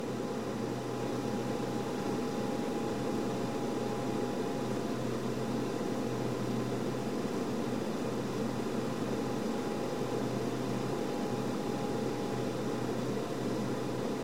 This sound was created by recording and mixing different functions of my clothes dryer.